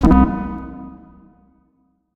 Okay, thats not as sine-ish as the name says. Just put a bunch of reverb together and lets see what happen.